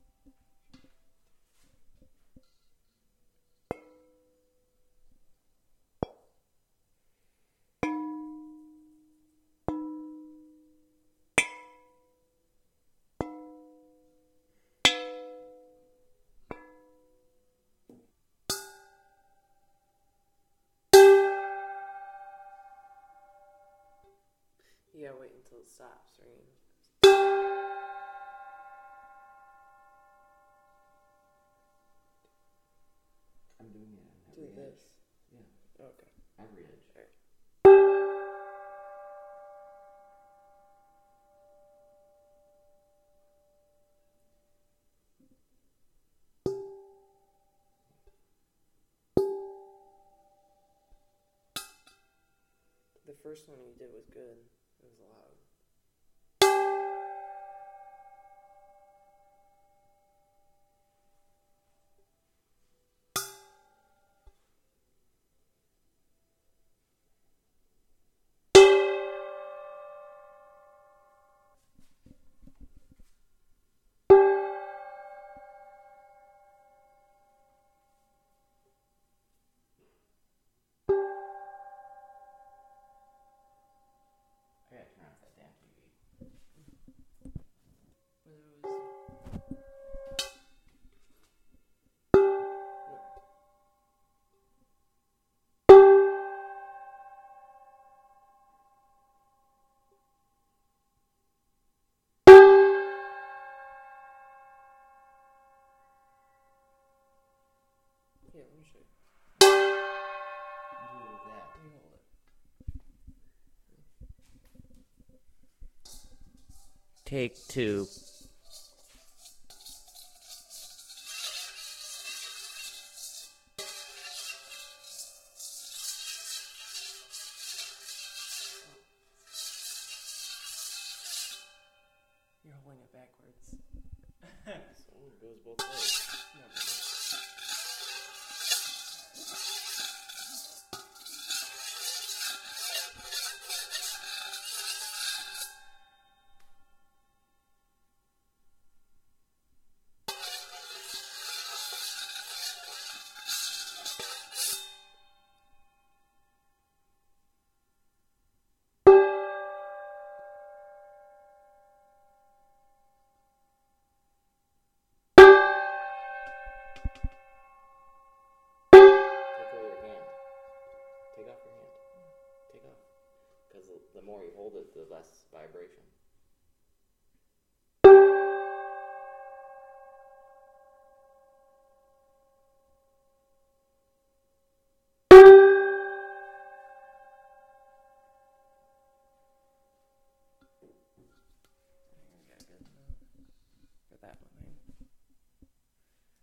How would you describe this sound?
This is a metal bowl being tapped, scrapped, and hit.